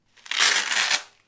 two velcro stripes being pulled up. Sennheiser ME62 + ME66 > Shure FP24 > iRiver H120